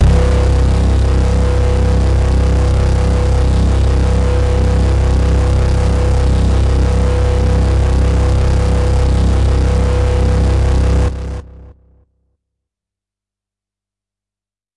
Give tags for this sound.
electronic hard harsh lead multi-sample synth waldorf

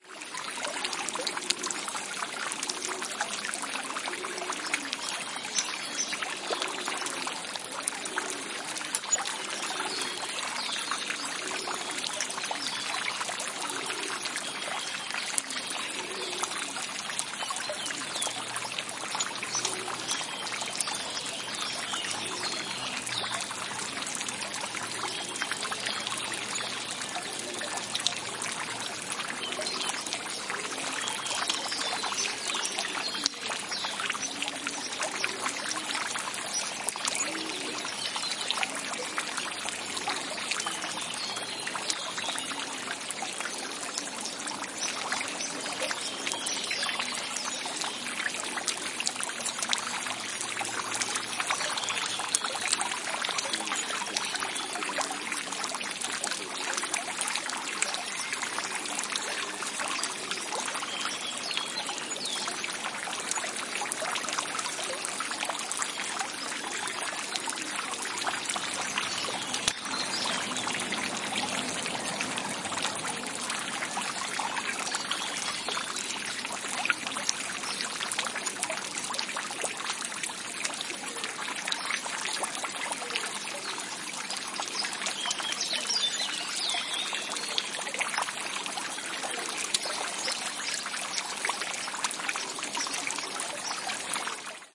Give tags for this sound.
water fountain park ambiance ambience liquid splash field-recording trickle city

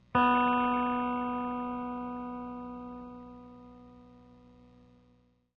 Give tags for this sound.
electric guitar note sample squire string

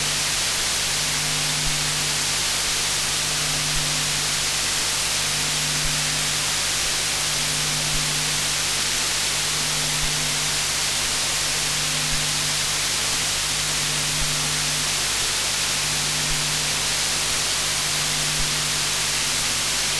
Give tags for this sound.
roland sound chorus

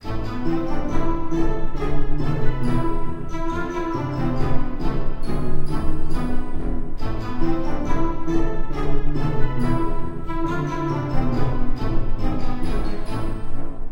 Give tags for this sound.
cartoony gamemusic classical

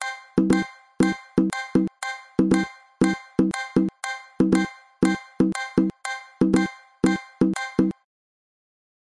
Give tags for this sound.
Recording media

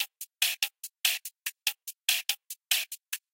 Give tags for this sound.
140 BPM Hardbass Hardstyle Loops

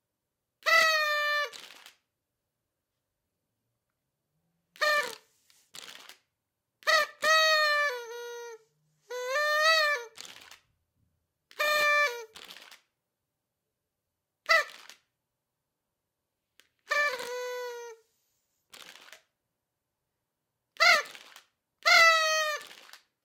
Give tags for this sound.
birthday celebration event party party-blower party-horn

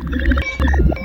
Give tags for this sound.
SoMaR KiT Volca Sample

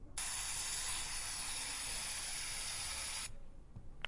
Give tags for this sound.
sounds snaps Switzerland home sonic

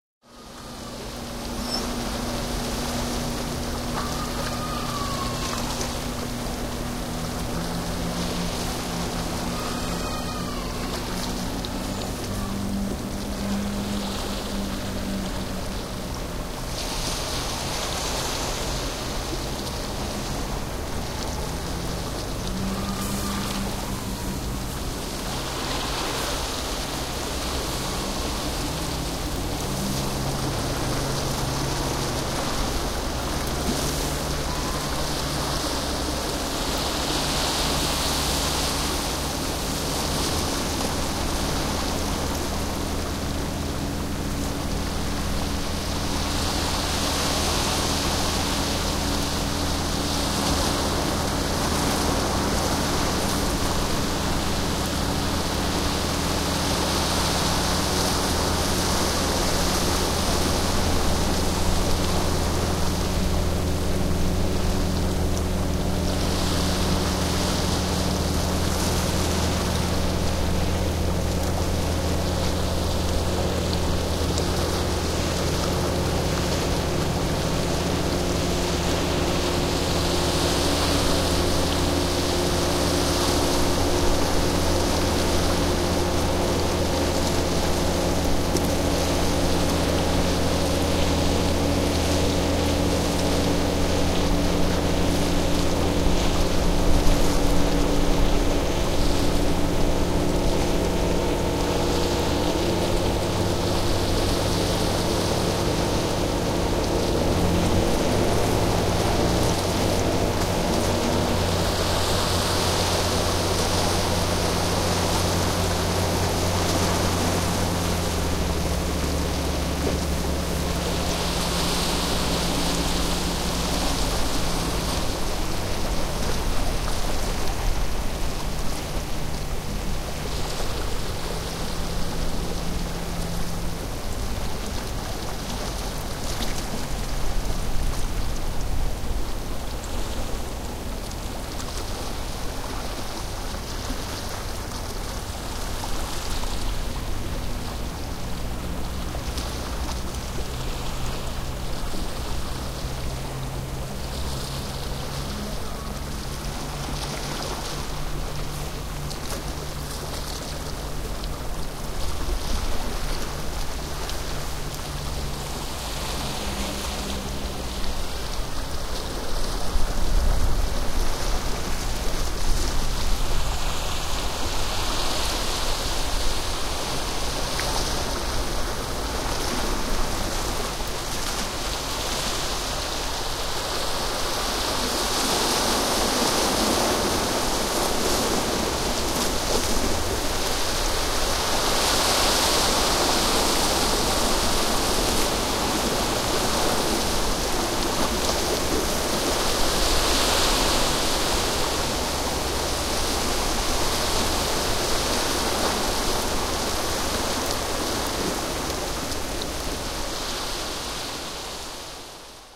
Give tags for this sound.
fishing bay village

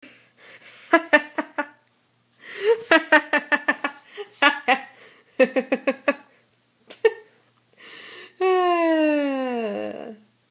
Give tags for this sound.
funny fun happy laughter happiness laugh laughing

